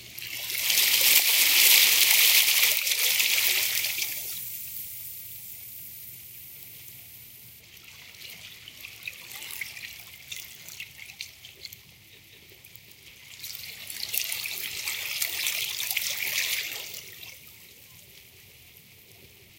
A re-arranged field recording. Original recording and arrangement by jcg- Aquatic Park S.F. Ca USA. loopable.